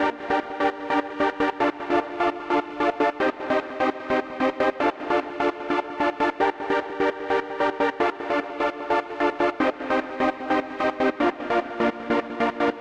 Electric Air 01
drum, phase, sequence